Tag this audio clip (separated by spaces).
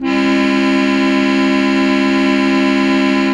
electric organ